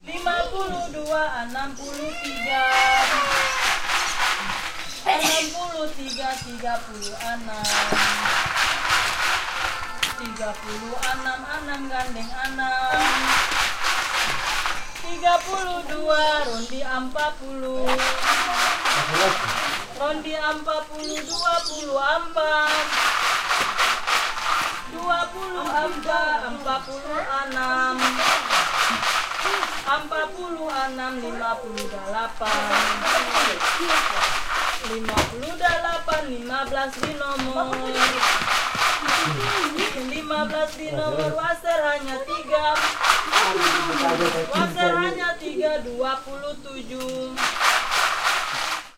A local woman sings as part of a game in the tiny fishing village of Saleman on the insanely beautiful north coast of Pulau Seram, Maluku (Moluccas), Spice Islands, Indonesia. The game was described as being similar to "bingo".